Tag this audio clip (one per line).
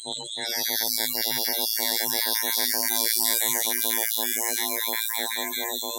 freaky
abstract
sound
strange
weird
effect